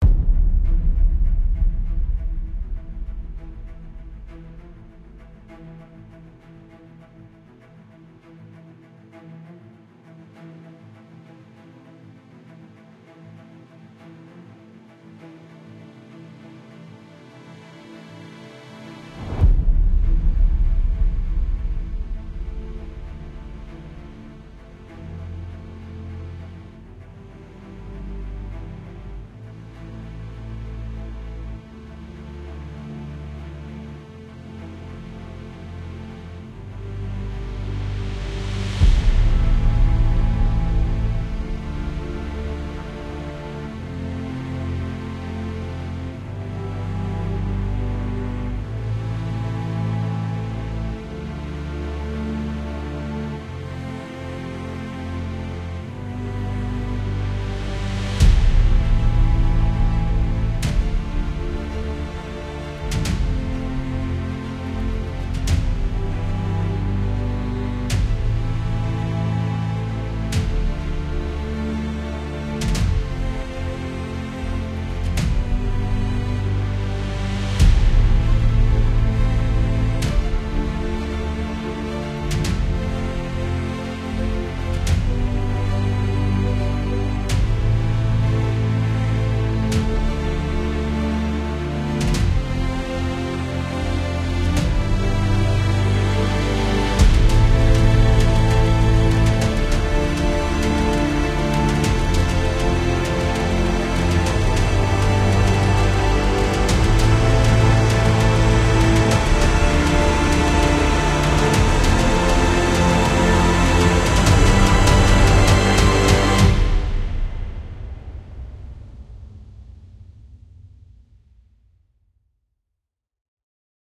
Cinematic Music-04
Fantasy, Music, Suspense, Action, Trailer, Drama, Cinematic, Orchestra, Cello, Movie, Score, Instruments, Free, Violin